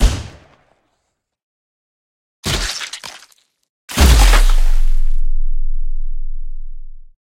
Big Zombie Hit 1
Three big, bloody, gooshy, gory zombie hits.
blood, dead-season, drip, flesh, gore, hit, smash, splat, squish